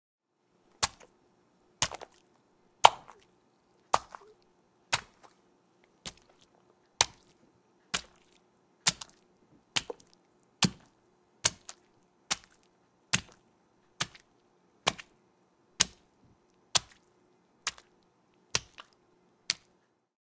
Multiple hits to a orange with a crowbar. Used for flesh smashing sound effect.